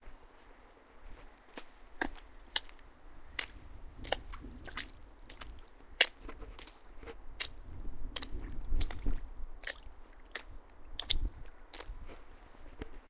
Walking through puddle
puddle, splash, wet, footsteps, walking, step, ground, steps, foot, water